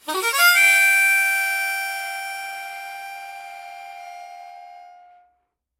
Ab Harmonica-3
Harmonica recorded in mono with my AKG C214 on my stair case for that oakey timbre.
ab, harmonica, key